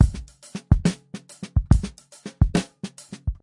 Perdie shuffle beat
Perdie shuffle loop at 140 bpm.
Sean Smith, Alistair Beecham, Joe Dudley, Kaleigh Miles, Alex Hughes + Dominic Smith.
Acoustic, Perdie-shuffle, Loop, 140-bpm